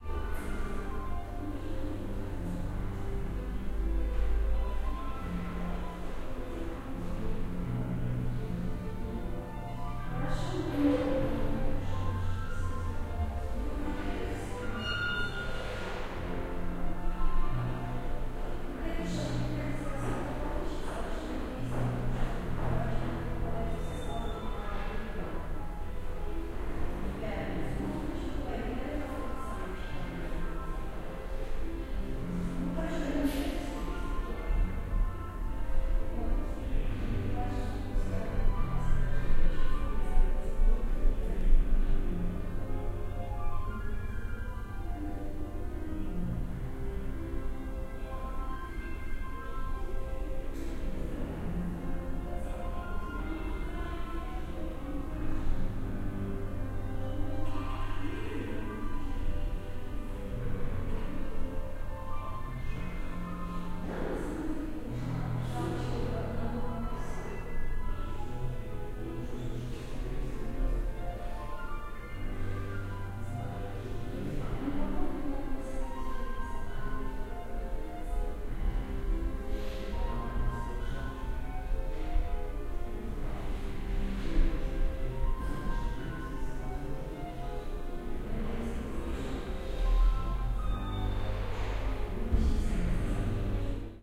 minsk klavierimtheater
I am sitting in the lobby of national theater for music in Minsk, Belarus. During preparations for our show I take a rest. sombody is practicing the piano and some women are preparing a buffet a floor underneath.
binaural-recording, natural, piano, space, theater